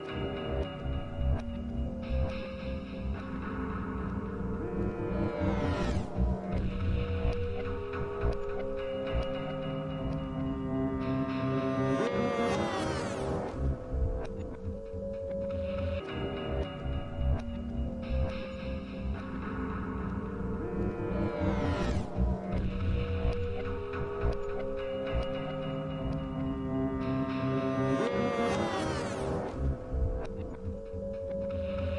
Moog Filtatron 02
A loop made with the Moog Filtatron app on my iPad and edited down in Adobe Audition 3